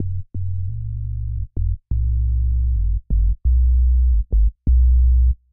Deep grooving D&B style bass part, tweaked in Garageband and rendered.